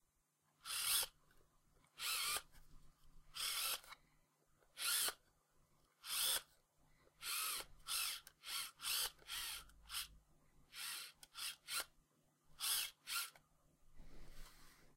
The sound of a Canon EF camera lens with autofocus turned on, being turned. It sounds like mechanics.
robot, focus, mechanical, automation, camera, machine, gadget, droid, cyborg, android, lens, robotic, dslr